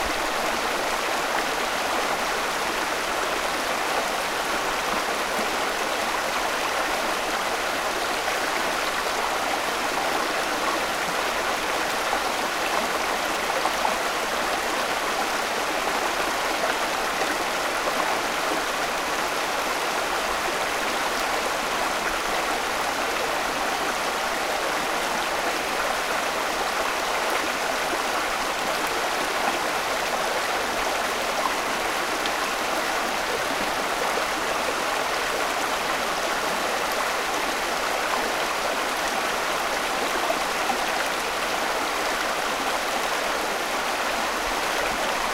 Lithia Creek 06
Running water in Lithia Creek, running through Ashland, OR, USA. Recorded August 1, 2008 using a Sony PCM-D50 hand-held recorder with built-in microphones. Nice range of natural water noise, faint background traffic noise.
ambient, built-in-mic, field-recording, splash, urban, water, wikiGong